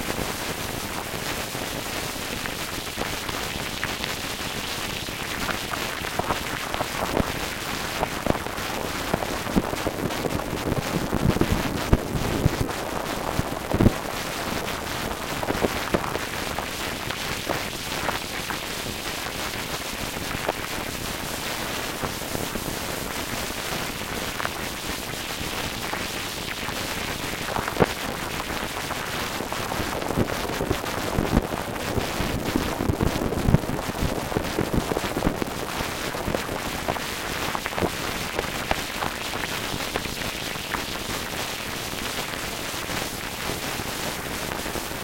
DB Bucks
Created and formatted for use in the Make Noise Morphagene by Devin Booze.
Noisy textures created using a Buchla 200/200e hybrid system.